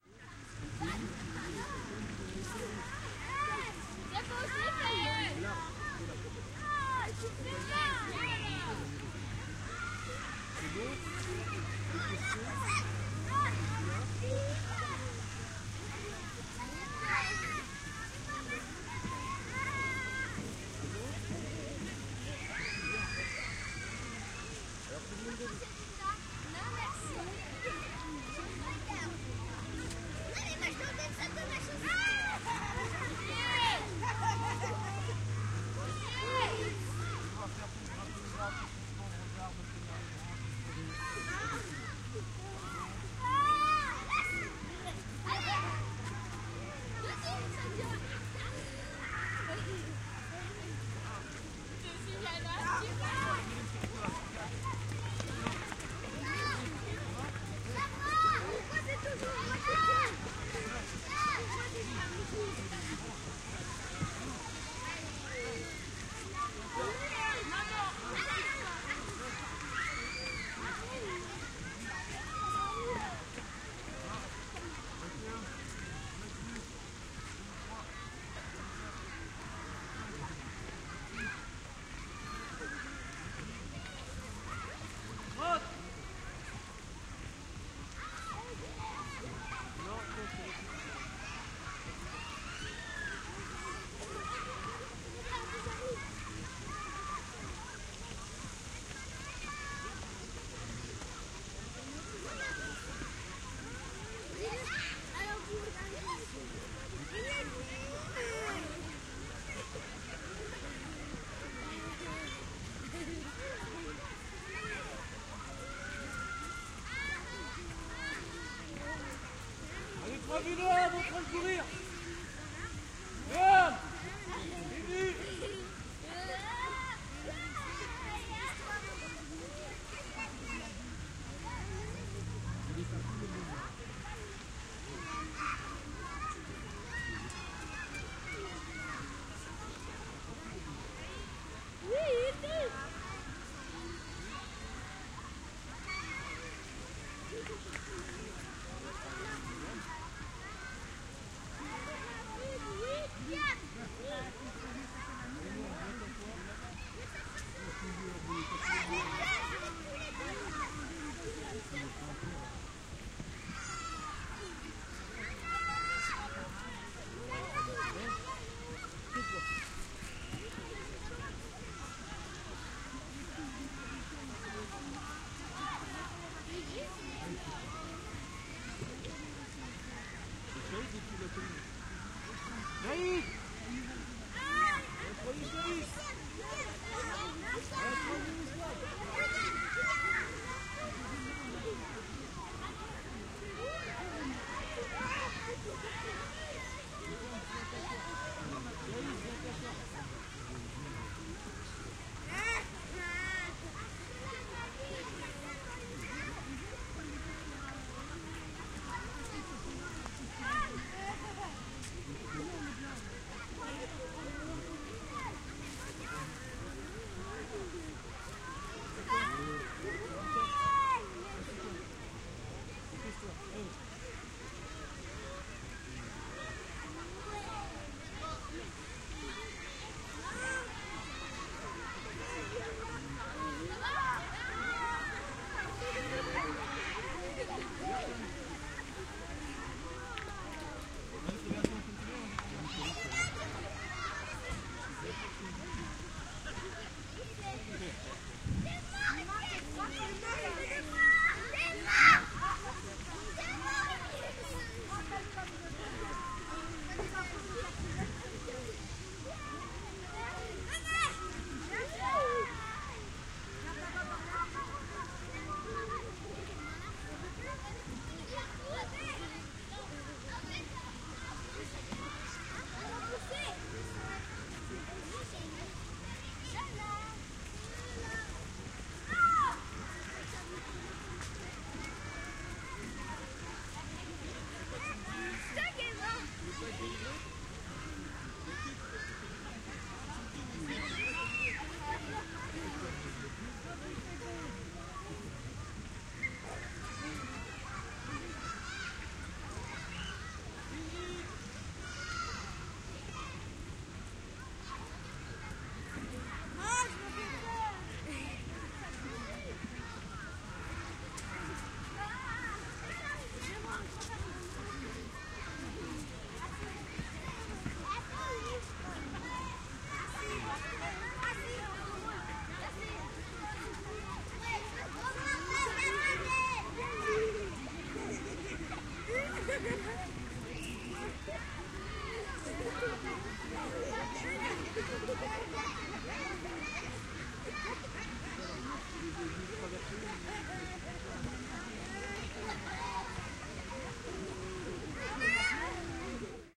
Sitting in the grass in a public park. (Binaural).
I recorded this binaural audio file in september 2018, during a sunny afternoon. I was sitting in the grass, in a public park of Nanterre city (near Paris, France). Around me, people were sitting in the grass too, and many kids were playing some meters away. In the right side, you can hear a fountain with water falling down on concrete, in which children can play when the weather is hot.
Recorded with an Olympus LS-3 and an Ohrwurm 3D binaural microphone.
Fade in/out and high pass filter 60Hz -6dB/oct applied in Audacity.